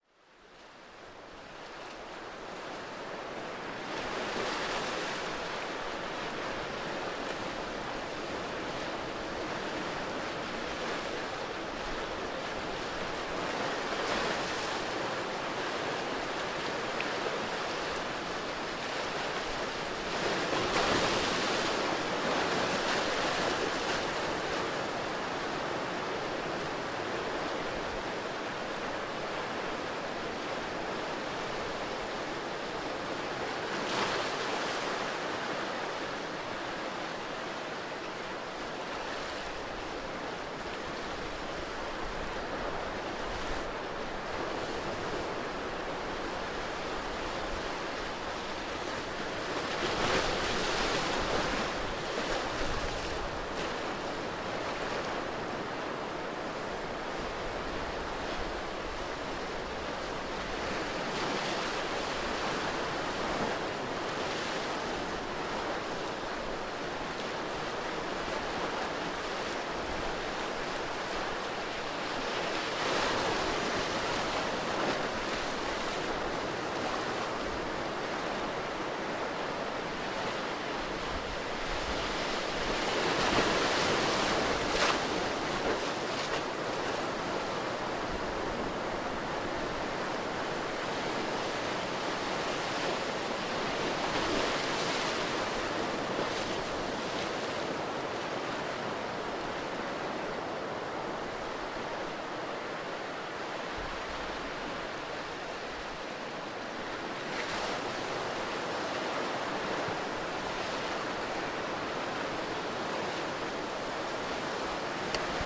big-beach-rocks-break
Some sea-sounds I recorded for a surfmovie. It features big rocks. Recorded in Morocco